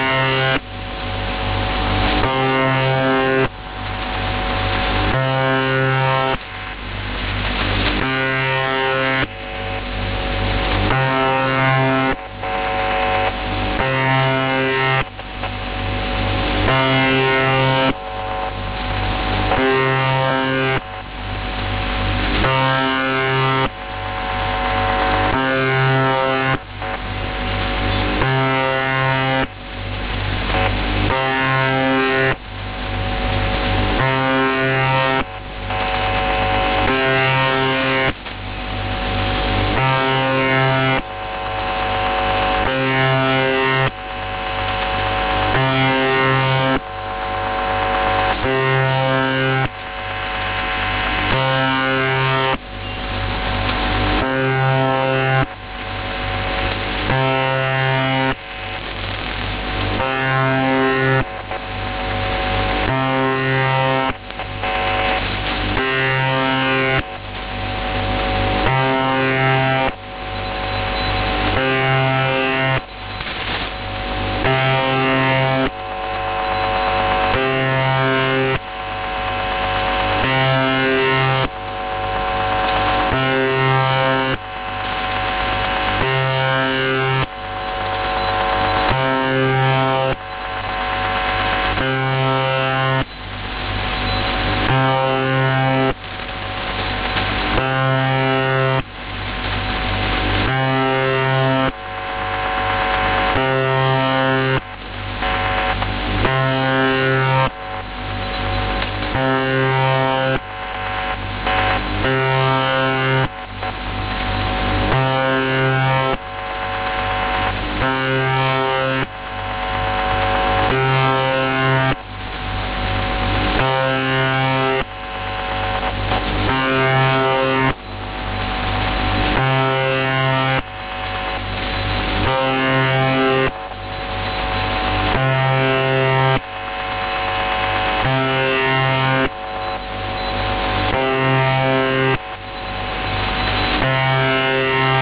"The Buzzer" radio signal from 4625 kHz Finland
Another sound recording from 4625 kHz. It's a russian radio signal called "The Buzzer".
I record sounds of things, because I like their sound. Go ahead and use those.